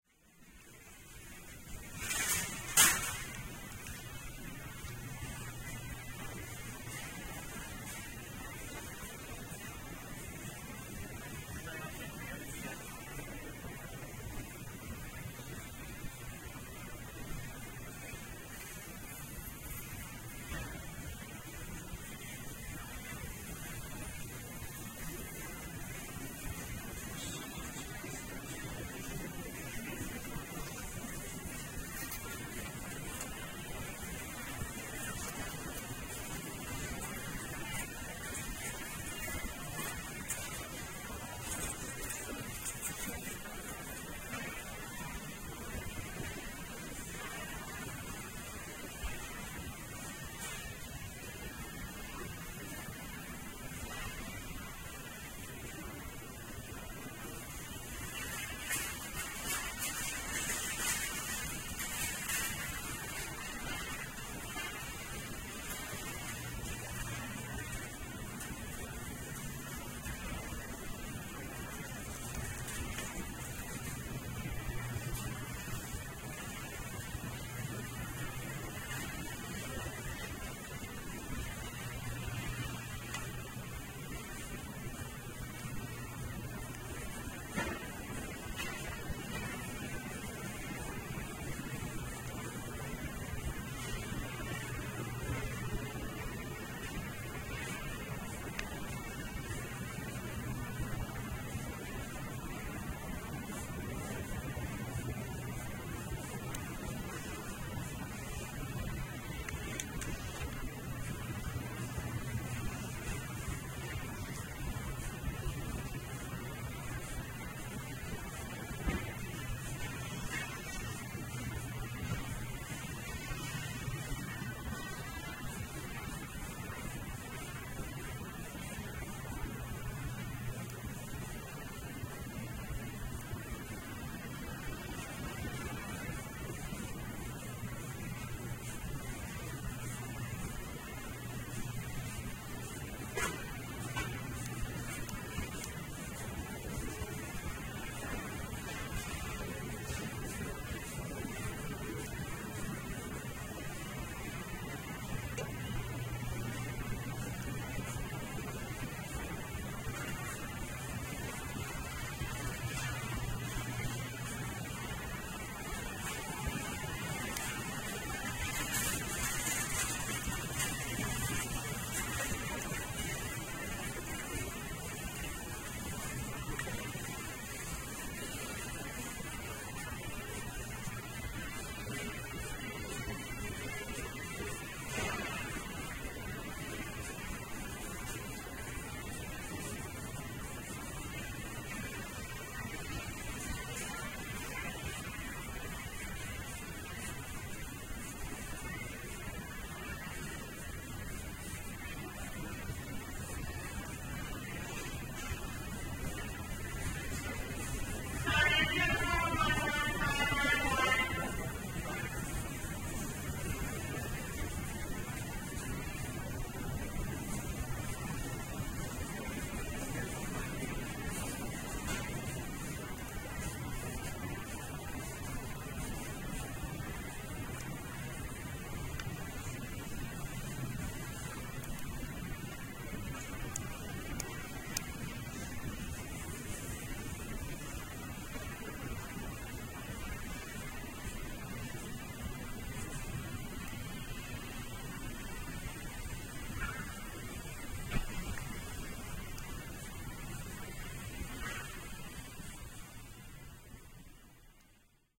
Store Ambience in produce store
This is an actual recording of the general atmosphere in a produce store. I actually went in with my recorder and walked around the whole store while recording. It is stereo and plenty long for any scenes in a produce store you might need to do. Part of the 101 Sound FX Collection.
ambience, can, checkout, clink, clunk, cooling, crinkle, food, produce, store